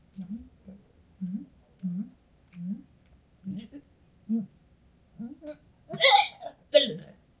2 ovejita escupe
throw, vomit, disgusting